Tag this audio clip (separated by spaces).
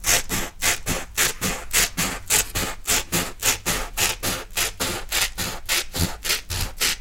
creation cut cutting office paper scissor scissors work